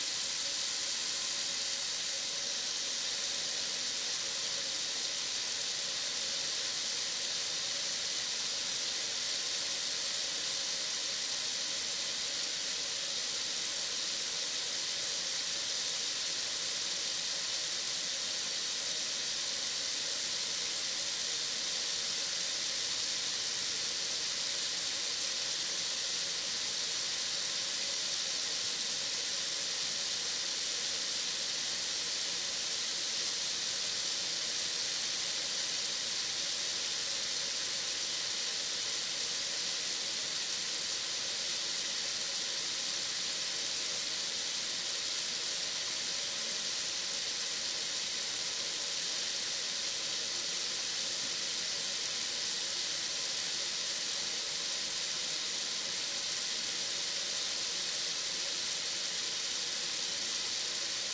opened water faucet in a steel sink